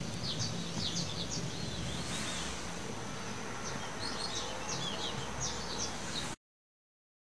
pajaritos morning
birds morning house
birds, house